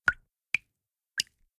Water Drop Single
water, Single, Drop, Drops